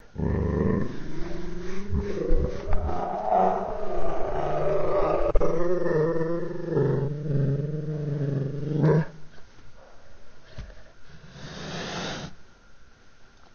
A cat growling and slowed down.
creature, growl